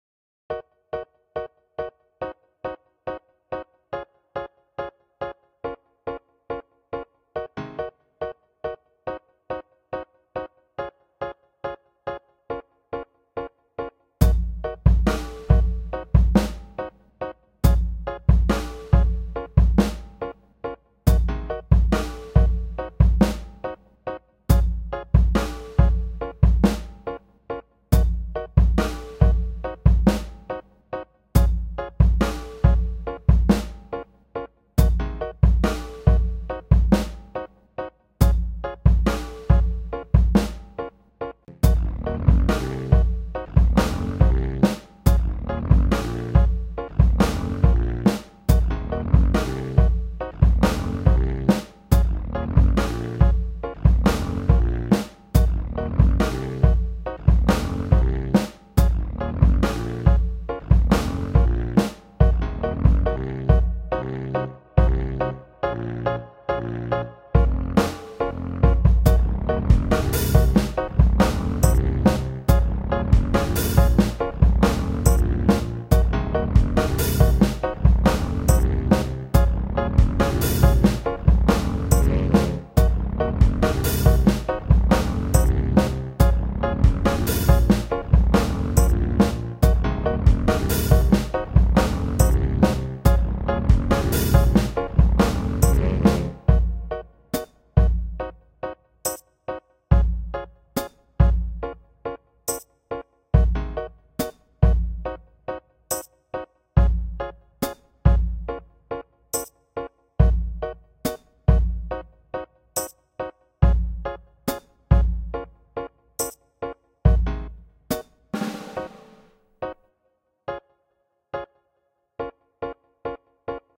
Vitage Pop Beat
Vintage Pop beat take a ride back to where The Beach Boy were with a little taste of the pop modern.
*** ONLY USE THIS SOUND IF YOU TAG ME IN AS A CREDIT IN ONE OF THESE SOCIAL MEDIA :
(or simply write credit : KilUWhy , but if you can please tag me in because i need more people to know about me tho)
_Unfortunately my money didn't grow on tree so here's way you can help me :
THANK YOU VERY MUCH <3
Bass
90s, band, Beat, drums, Free, Freebeat, jazz, loop, music, old, Pop, sax, saxophone, Vintage